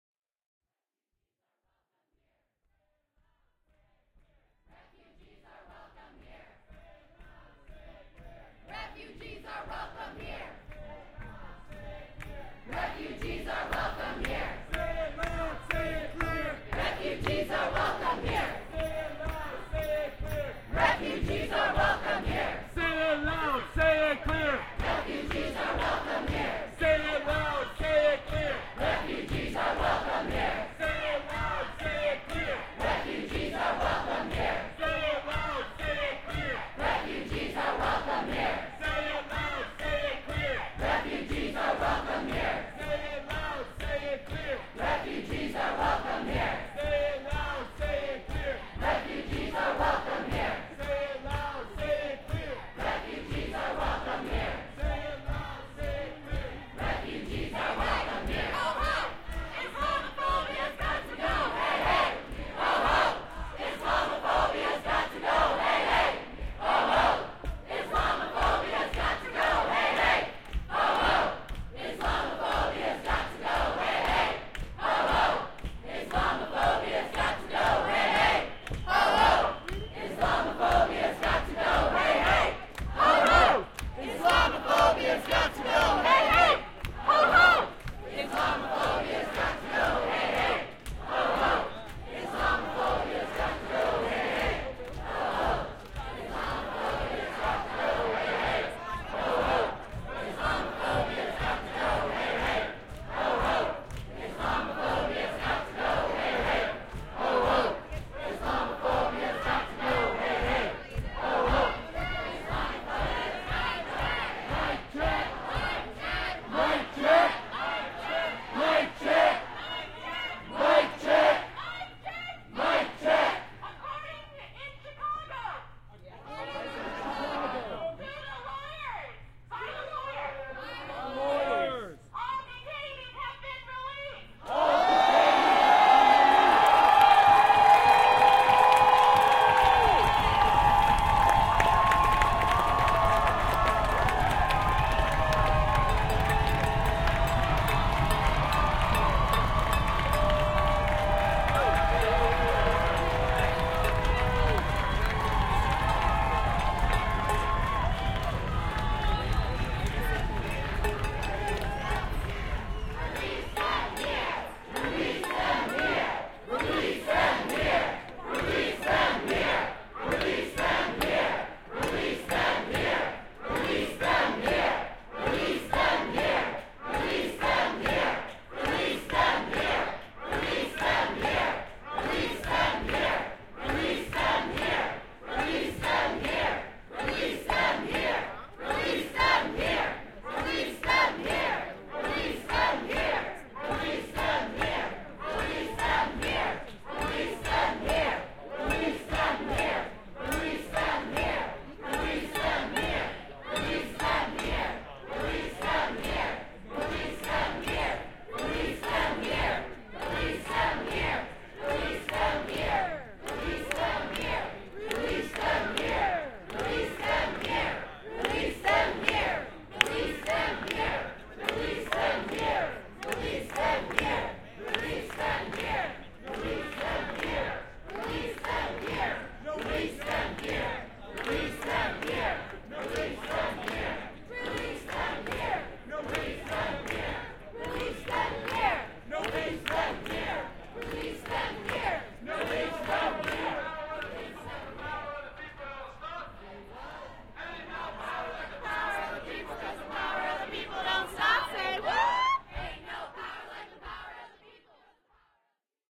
Release Them Here: Sea-Tac Airport Travel Ban/Immigration Protest
"Release them here..."
(That moment when an imperfect announcement cuts off a a medley of chants and brings good news)
Field/protest recording
Sea-Tac Airport, Seattle, WA, US
Immigration/Travel Ban Protest
1/28/2017?
Source:
DPA 4060 mics (used as binaural) -> Sound Devices 702
chant, field-recording